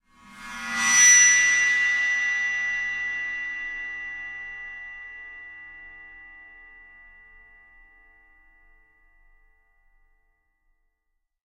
Bowed cymbal recorded with Rode NT 5 Mics in the Studio. Editing with REAPER.
paiste, special, hit, zildjian, cymbals, crash, ride, drums, meinl, china, metal, splash, bowed, bell, sabian, cymbal, percussion, groove, beat, one-shot, sample, drum